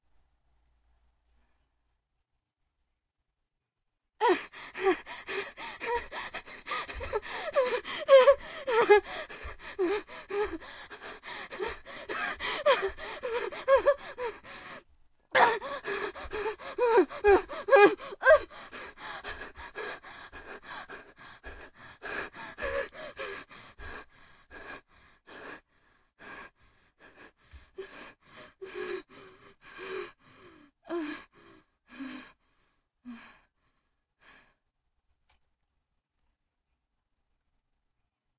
Female Running Scared
running, heavy, breathing, voice, human, gasp, panting, panic, breathe, tired, scared, breath